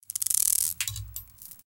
Change-Drink-Machine-Beads-Pulled by-JGrimm
Zip twisting mechanism sound, then a drop glug sound. Also sound like a bottle glug.
USE EXAMPLES: You can use this in a number of ways if you edit it.
1. Parking meter.
2. Edit/cut the sound so that it sounds like you are using a drink vending machine.
3. Use the end glug sound as bottle being put down. Or copy and past the glug multiple times in a row to simulate someone heavily drinking from a bottle.
4. Glug sound can be used as something dropping in water.
-[ RECORDING INFO ]-
I created this accidentally while making bead strand zip sounds and hitting the microphone.
STUDIO MIC: AT4033
RECORDED IN: Adobe Audition 3
MASTERED: Using EQ, Compressed, Noise Gated, and Normalized to -.1
*NO CREDIT IS EVER NEEDED TO USE MY SAMPLES!
change, coke, drink, machine, meter, parking, vending